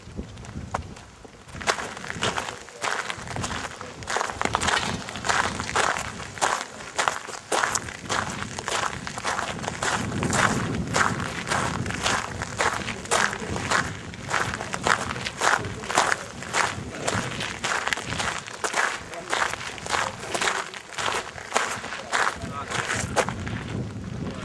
Footsteps on rocky surface